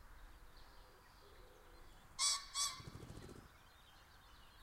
A Ring-necked pheasant, also called common pheasant. Recorded with a Zoom H5 and a XYH-5 Stereo mic.
common, bird, colchicus, ring, field-recording, phasianus, pheasant